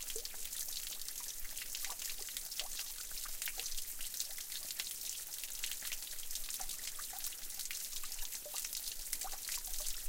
Recorded above a street drain taking overflow water from a village fountain.
babbling, flow, gurgle, splash, street-drain, trickle, water